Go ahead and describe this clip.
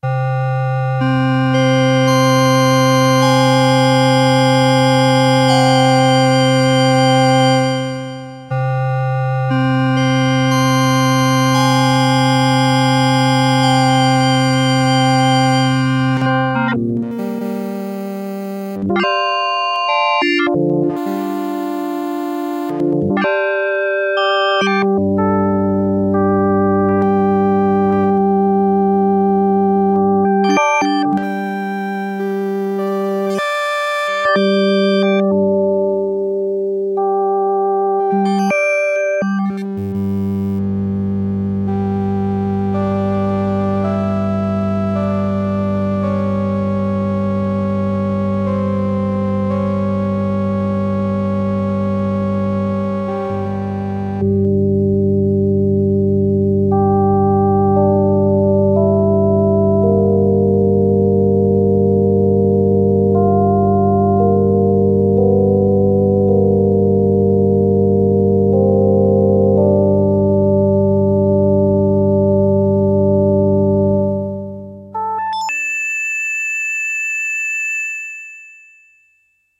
Recordings of a Yamaha PSS-370 keyboard with built-in FM-synthesizer